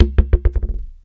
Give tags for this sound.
variable thumps brush taps objects hits random scrapes

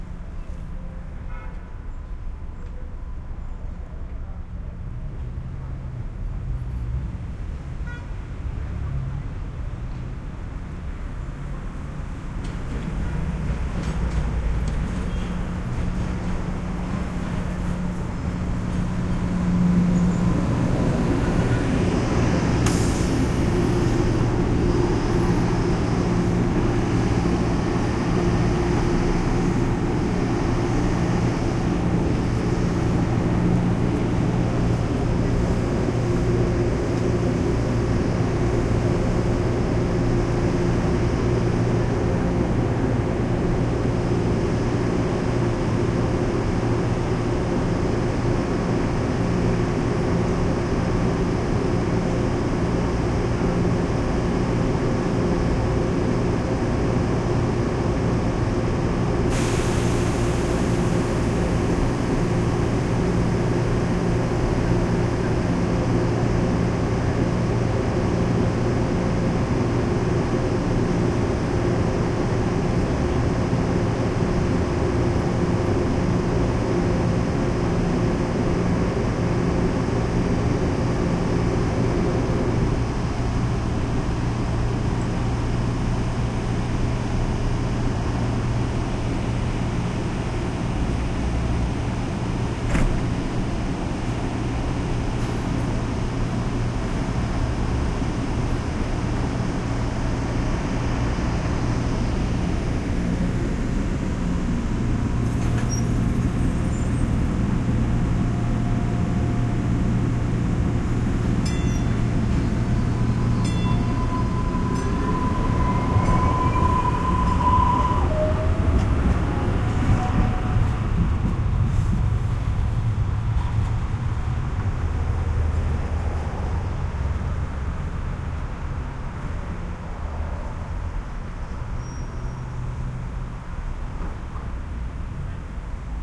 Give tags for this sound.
field-recording t mbta boston subway train stereo

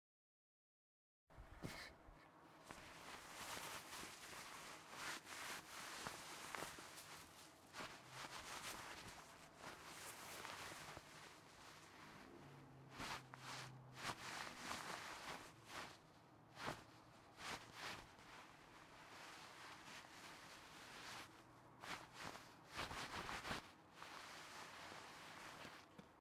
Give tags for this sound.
fabric-rubbing Fabric Cloth-pass

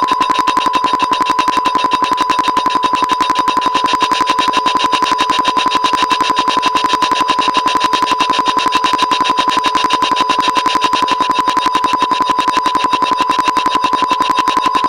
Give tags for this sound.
building-lumps fragments loops music-bits

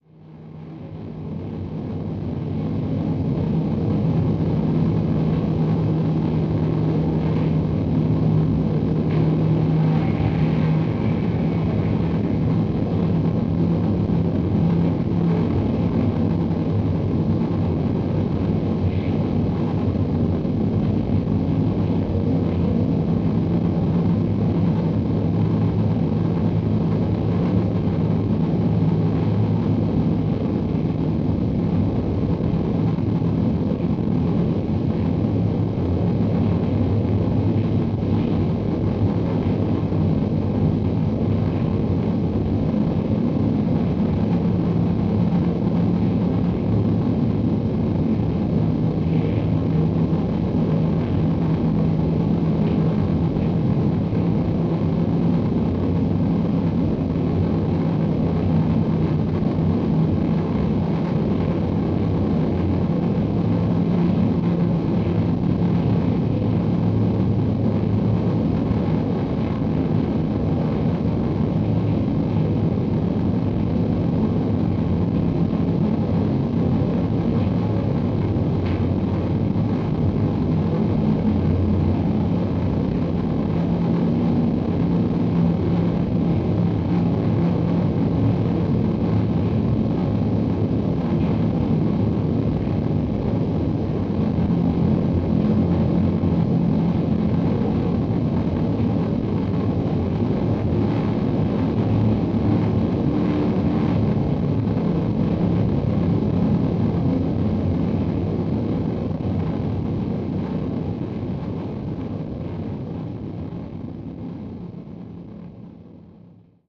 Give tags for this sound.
Interior Old

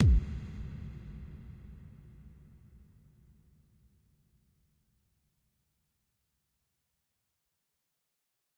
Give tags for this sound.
crisp club 5of11

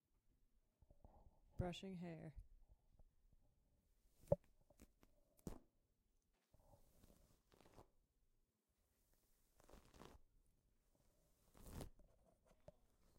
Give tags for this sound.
Brush Hair Messy

